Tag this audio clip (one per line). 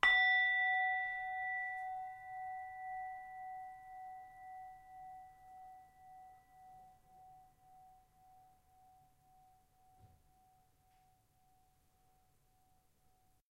bell
campane
monastery
temple
tibet